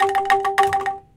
g3-bandung-angklung vib
toy angklung (wooden shaken percussion) from the Selasar Sunaryo artspace in Bandung, Indonesia. tuned to western 12-tone scale. recorded using a Zoom H4 with its internal mic.
angklung, bamboo, hit, indonesia, percussion, sundanese, wooden